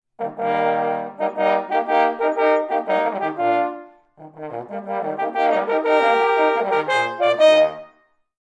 horncall beethoven3 Eflatmajor
A heroic hunting-horn-like theme in E-flat major for three horns, from Beethoven's 3rd Symphony. Recorded with a Zoom h4n placed about a metre behind the bell.